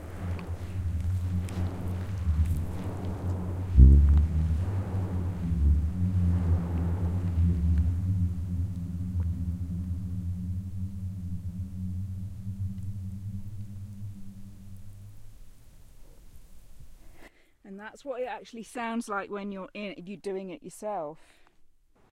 Booming sound created via an avalanche on Kelso Dunes.